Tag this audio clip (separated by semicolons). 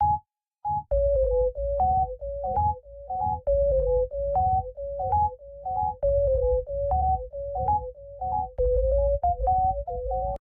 sweet; gentle